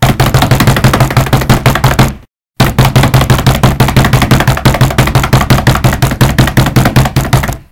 SR PELO RUNNING SOUND 2
I've created this funny sr pelo running sound. it's easy to create all you have to do is just slam your hands so many times as fast as you can to make it sound accurate.